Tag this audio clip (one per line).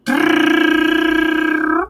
adventure
arcade
console
display
game
score
sound
videogame